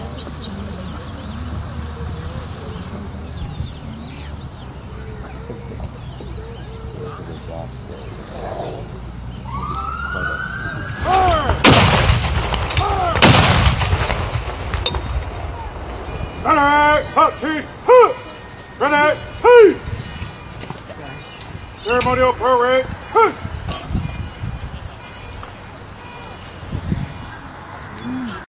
ceremonial cannon fire
Memorial day cannon fire and closing remarks from Presidio San Francisco. empty crowd noise and build up, mild siren, then two ordered cannon fires
ceremonial, cannon, day, fire